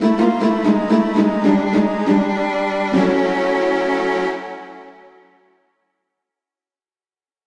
A sad jingle, that indicates you lost something, e.g. a game.
game-over, lost, sad, jingle